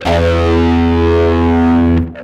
Recorded direct with a Peavey Dynabass in passive mode, active mode EQ is nice but noisy as hell so I never use it. Ran the bass through my Zoom bass processor and played all notes on E string up to 16th fret then went the rest of the way up the strings and onto highest fret on G string.